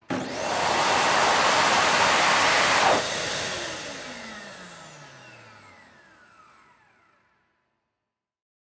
Hand Dryer 4 (no hand movement)
Recording of a Hand-dryer. Recorded with a Zoom H5. Part of a pack